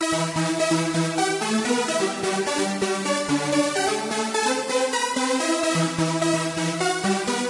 a nasty synth lead. made with subtractor synthesizer from reason.